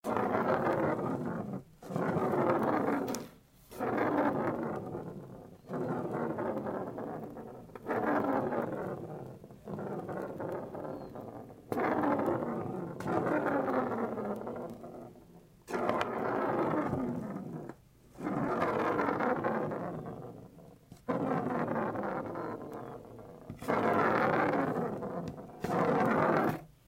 Foley that sounds like a roar.
Suspense, Foley, Roar